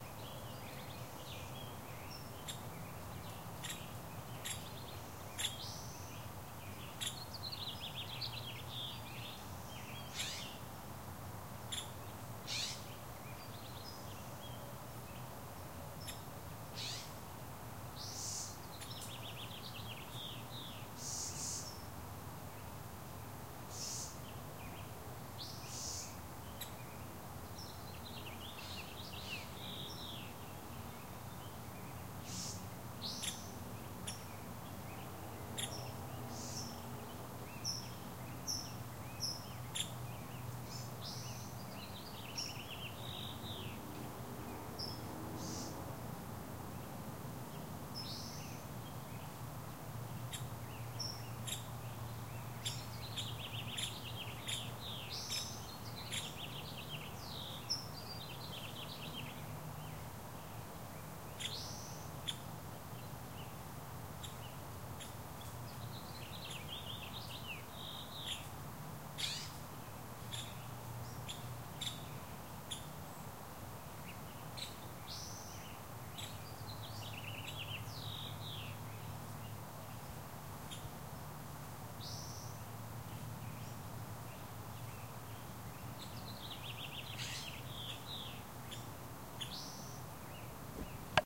Birds Chirping in Griffith Park
The sound of birds while on the trails at Griffith Park.
chirping, ambience, birds, nature, spring, field-recording